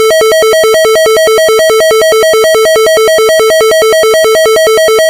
Electronic Siren
An Emergency siren, electronic. Rapidly switching 440 and 640 Hz. tones. Made in Audacity, by me. Thanks!
alarm, alert, attention, civil, electric, electronic, emergency, horn, police, sfx, siren, sirens, test, tornado, warning